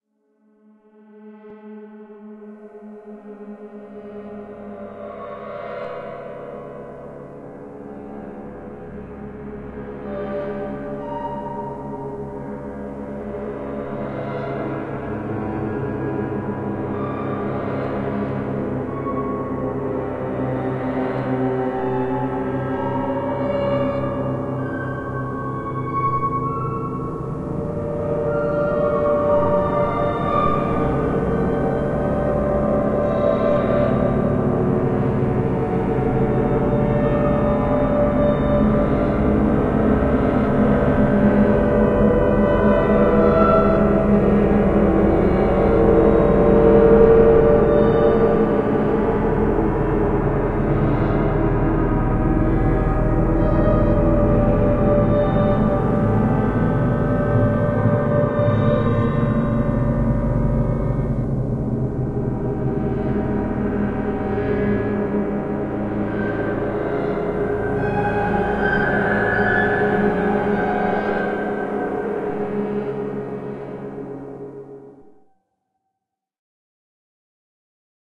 piano impression1
This is a clustered piano-sounds file to show what one could do just by clustering normal, reversed and partly played detuned piano-samples.Great sound to create 'horror' atmospheres.
clustered, creepy, detuned, eery, filmic, horror, impression, instrumental, music, piano, piano-preview, preview, sound-track, soundtrack, tension